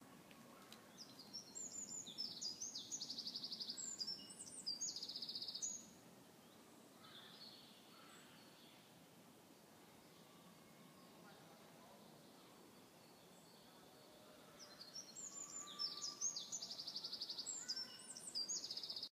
a forest in springtime. Birds are singing from far and near.
recorded in Bonn, Germany
Fr, forest, spring, v, birds, gel, field-recording, hling, nature, Wald, bird